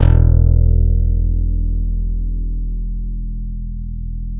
Bass, MIDI note 28, loud, looped
multisample, single-note, bass